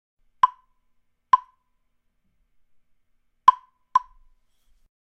African Claves
Part of a pack of assorted world percussion sounds, for use in sampling or perhaps sound design punctuations for an animation
hits, percussion, world